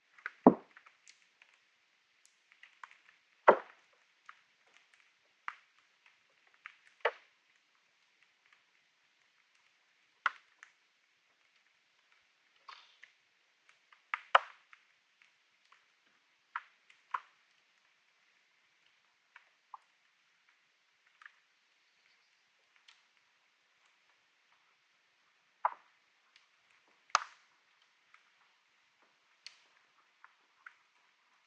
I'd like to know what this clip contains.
Field recording from an island just outside Helsinki, Finland. Ice is almost melted, just thin layers left.. It was a sunny day so ice kept craking, some light waves. Almost no wind.
Hydrophone -> Tascam HD-P2, light denoising with Izotope RX7